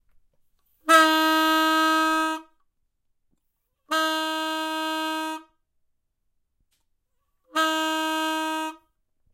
13FMokroluskyT troubeni
horn, ship, toot